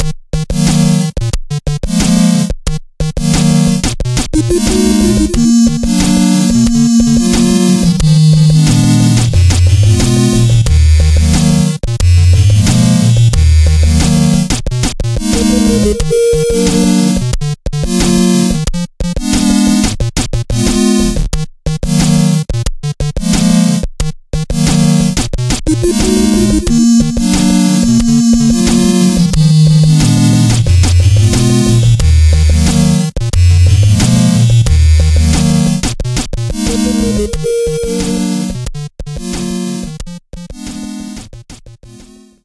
Lost Moons -=- Mirroring
An 8bit tune designed with Denver in mind.... o_0